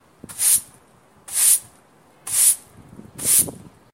A broom sweeps the floor